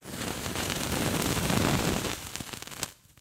Fire Fuse Ignite Flame
burn, Fuse, flame, Ignite, Fire, Sizzle, burning